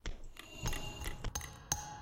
BRASSEUR Justine 2020 2021 Mixt
This sound was inspired by "prehistoric/primitive music". The idea behind this process was to create a sound exclusively with natural elements. I recorded noises made from knocking on different surfaces : glass, metal, stone and a bamboo stick. I added echo and blowing effects while using different panoramic settings to create dimensions and a more realistic atmosphere to the sound.
bamboo, glass, knock, metal, preliterate, raw-material, stone